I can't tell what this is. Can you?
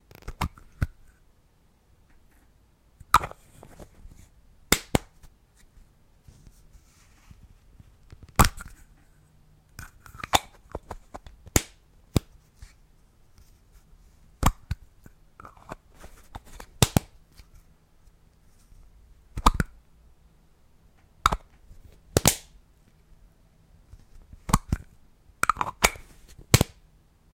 Film Canister Open and Close Sounds
The sounds of me opening and closing the lid on a 35mm film canister. Recorded with a Blue Yeti Pro microphone.